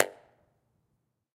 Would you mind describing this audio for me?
Tunnel 3 Impulse-Response flutter echo